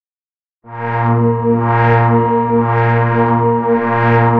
Here is a sub bass sample generated in SC